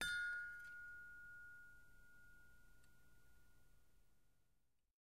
wind chimes - single 01
A single wind chime tube hit.
chime, chimes, hit, metal, metallic, tone, tuned, wind, wind-chime, windchime, wind-chimes, windchimes, windy